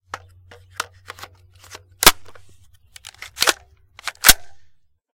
AR15 pistol load and chamber
An AR15 pistol being reloaded. Clip loaded and bullet chambered.
army, ar15, gun, clip, military, pistol, weapon, cock, reload, loading, chamber